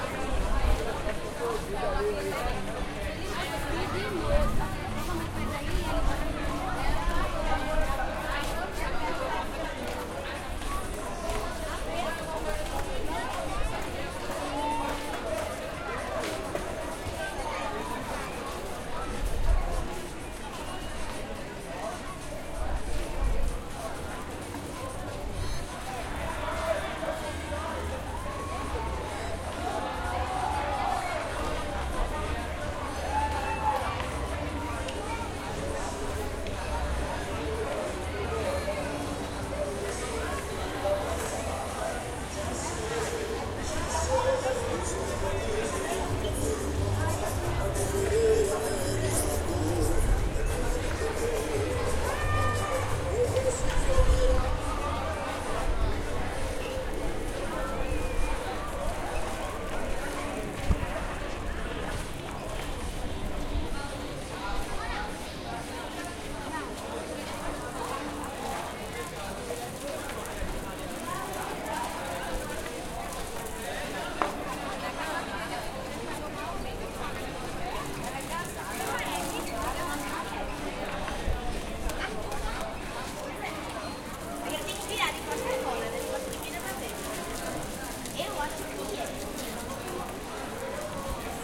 Park Ambiance
Field Recording at a sunday afternoon at Park Dona Lindu, Recife, Pernambuco, Brazil.
Field, H4n, Lindu, Park, Recife, Recording